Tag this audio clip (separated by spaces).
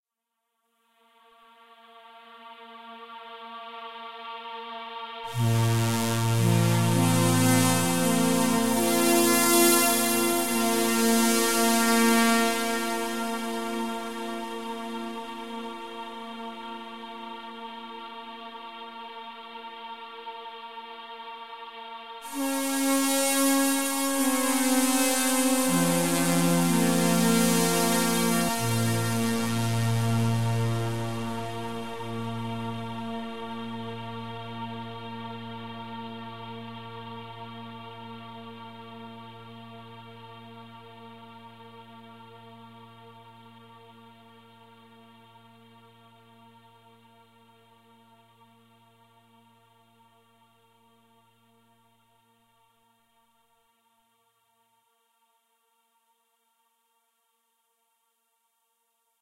engl knight vitz